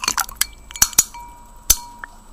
ice cracking in a glass (full of punch)